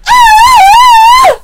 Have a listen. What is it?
It's a scream
agony, upf